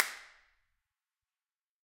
boat dock IR fix
cap gun IR zoom h1
impulse-response, IR, reverb